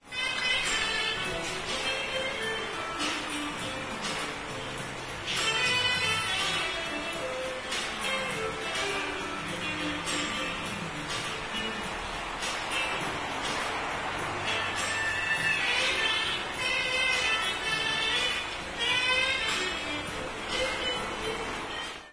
26.08.09: Poznan/Poland. The Sieroca street near the Old Market. The Cafe Fantazja: the music is audible on it's corridor. Evening.
cafe, center, club, corridor, fantazja, music, oldtown, poznan, pub